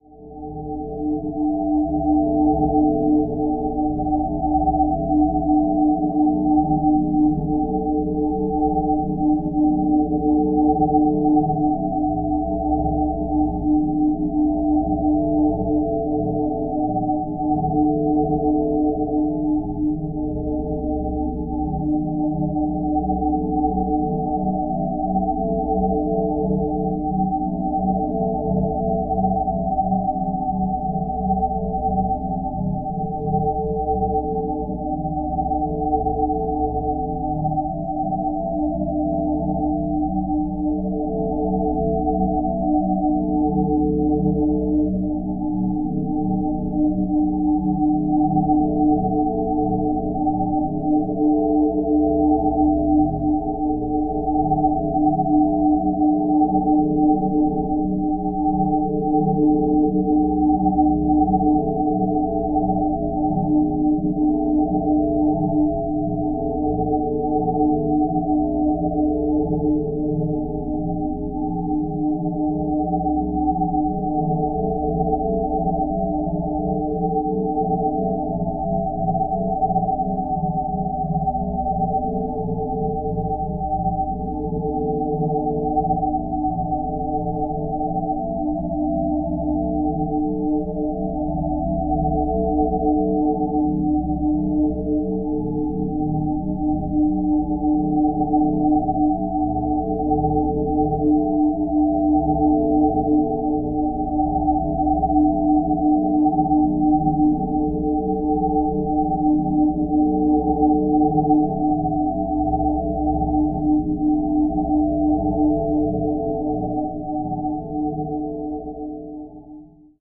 This sample is part of the "SineDrones" sample pack. 2 minutes of pure ambient sine wave. This could be some tribal loop mangled through a tower of effect gear.